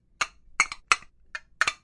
Sound of two metal objects being hit against each other.
clang, hit, metal, metallic